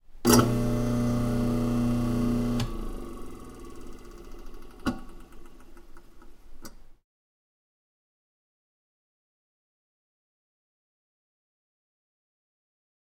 Garbage disposal being turned on then turned off.